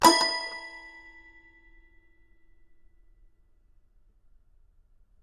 Toy records#06-E3-03
Complete Toy Piano samples. File name gives info: Toy records#02(<-number for filing)-C3(<-place on notes)-01(<-velocity 1-3...sometimes 4).
piano, toy, instrument, sample, toypiano